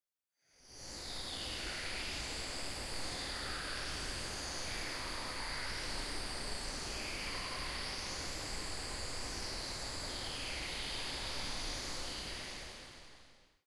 Bird Park
Forest ambience with synthesized bird sounds
forest, nature, birds, park, ambience